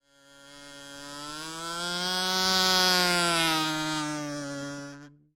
the sound of a kazoo flying past
kazoo, MTC500-M002-s13